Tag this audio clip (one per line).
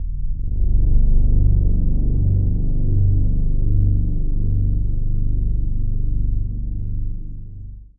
reaktor
pad
multisample
ambient